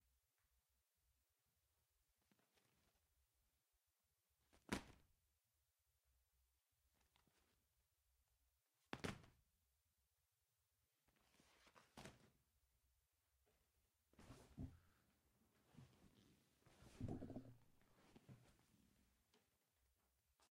sentón sobre un sillón
chair, n, sent, silla